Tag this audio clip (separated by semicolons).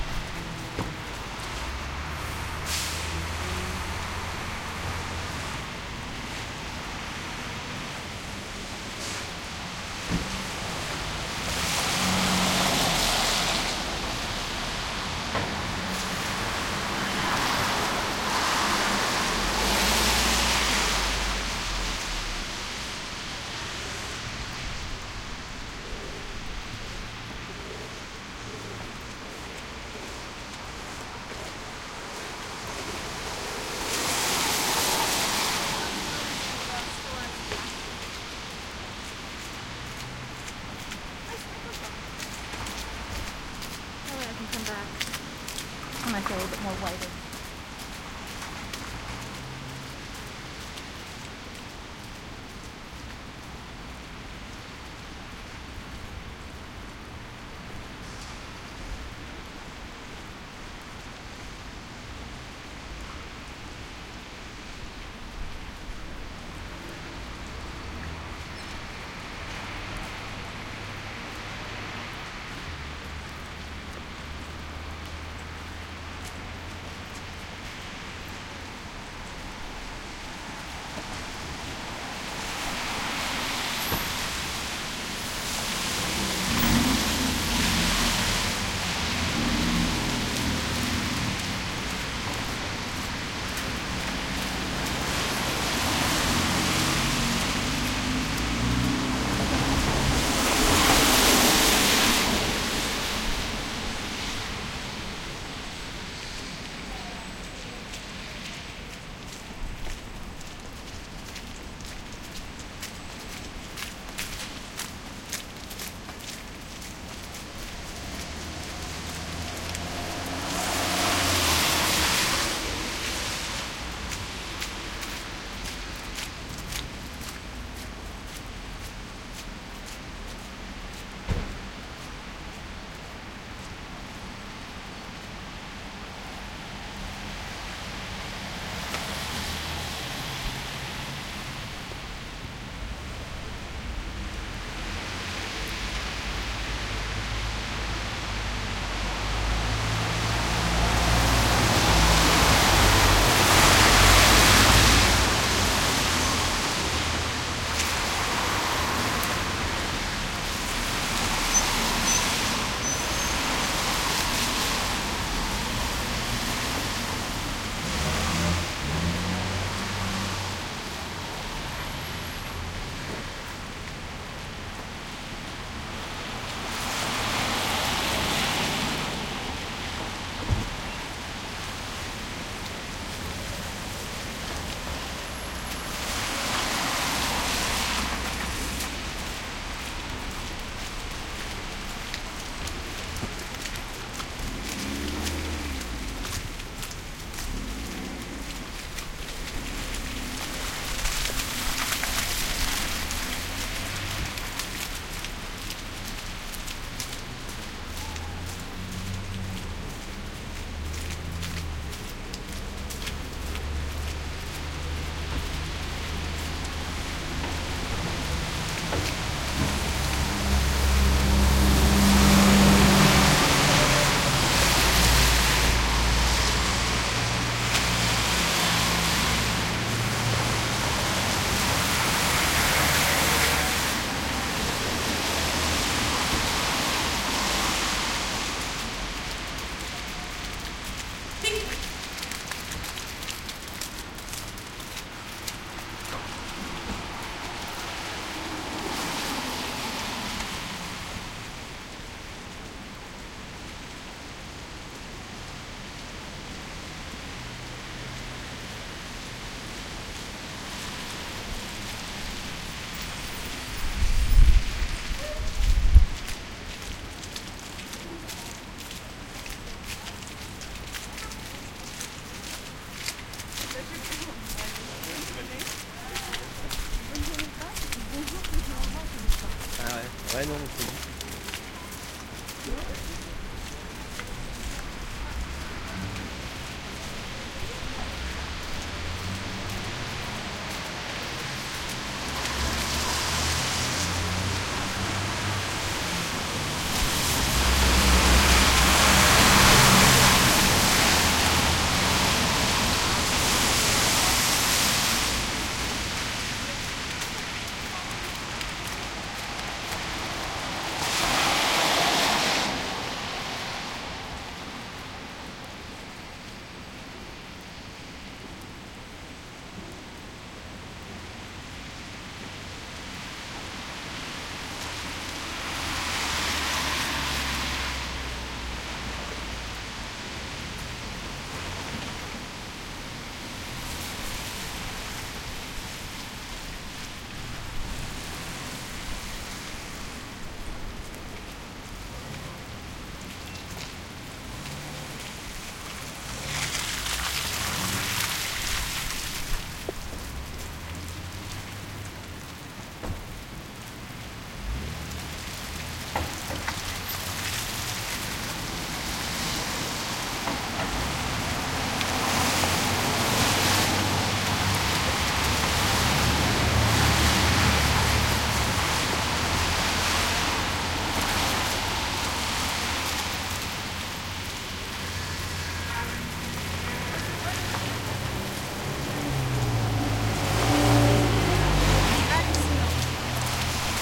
Mont; Montreal; wet; people; street; Canada